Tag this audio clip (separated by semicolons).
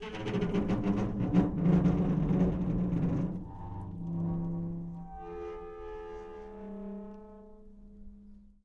large; gate; squeaks; metal; bangs; rattles